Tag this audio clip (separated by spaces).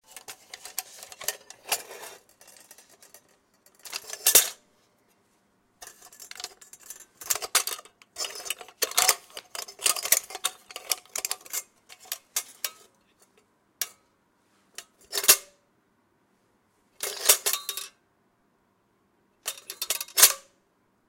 petroleum lantern petroleum-lamp night lighting retro lamp oil light